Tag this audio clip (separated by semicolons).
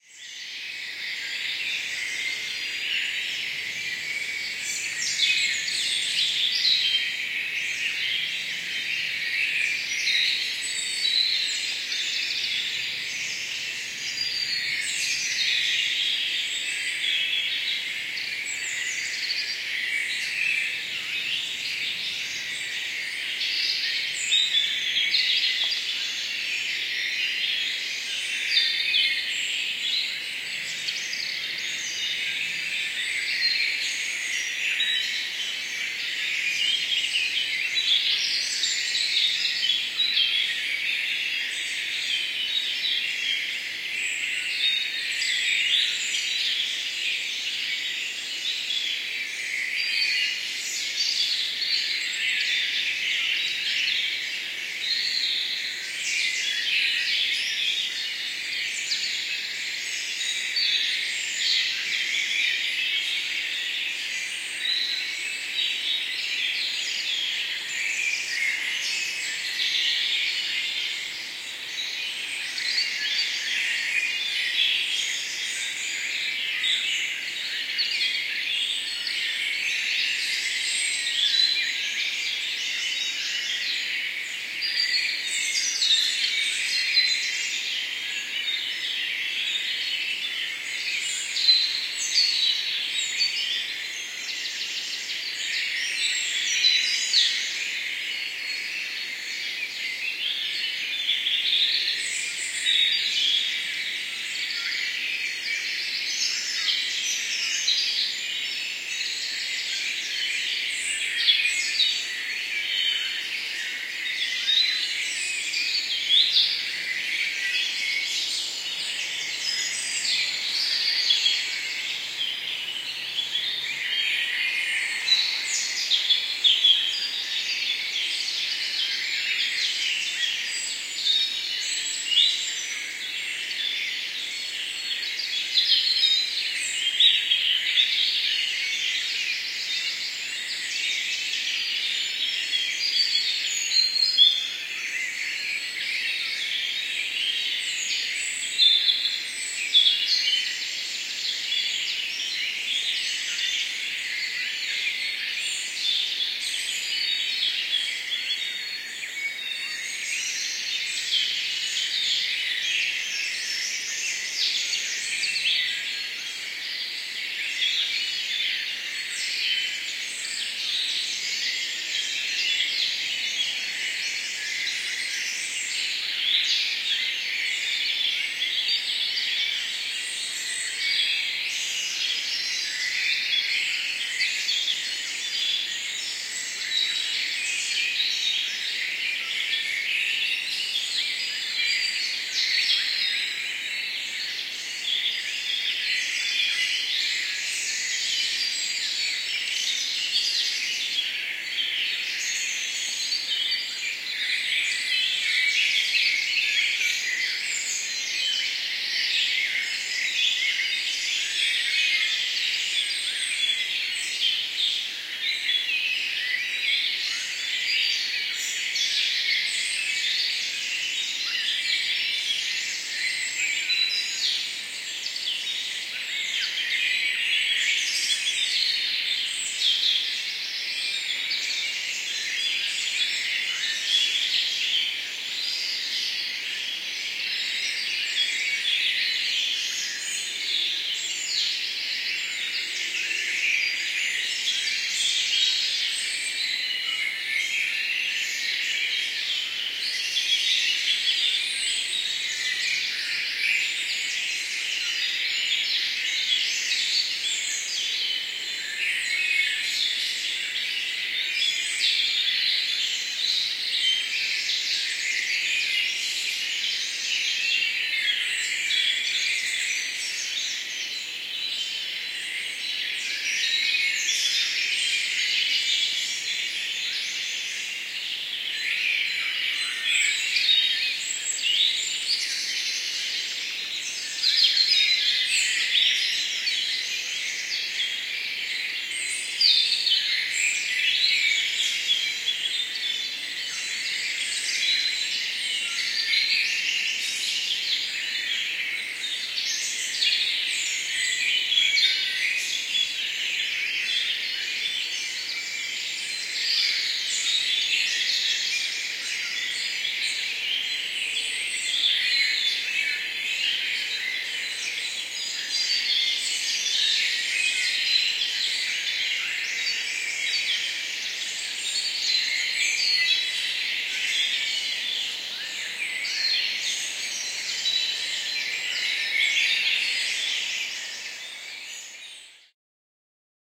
am; Morgen; twittering; Wald; Zwitschern; wood; nature; dawn; birds; morning; woods; Natur; bird; gel; Sonnenaufgang; V; forest